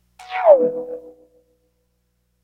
Chord Descend
Cell notification I made using a Korg Electribe ESX
clicks, mobile, phone, push-button